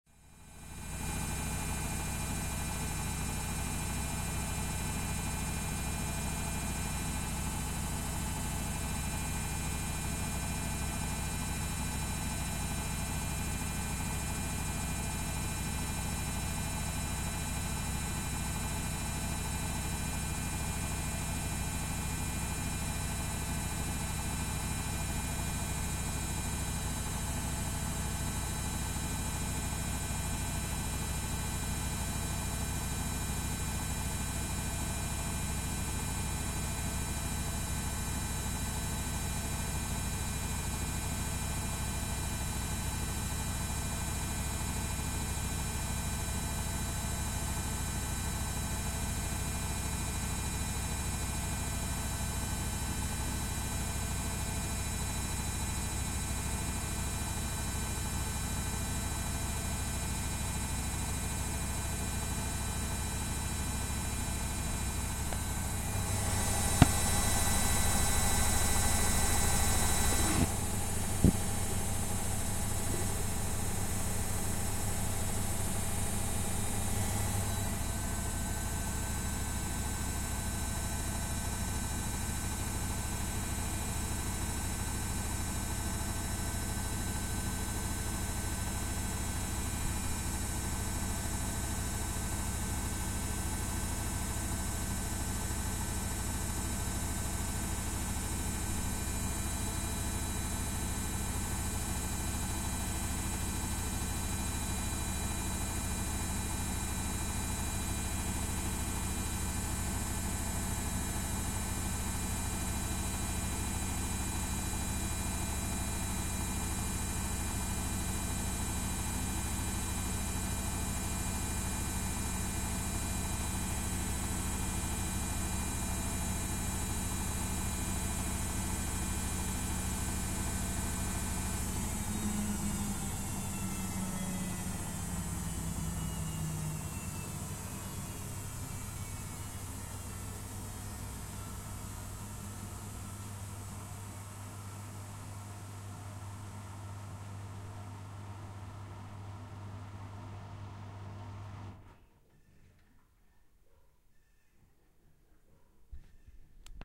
Spin Cycle - Washing machine
Recording of a washing machine on spin cycle. Recorded with Yamaha Pocketrak cx.
laundry, machine, noise, spin-cycle, washer, washing, washing-machine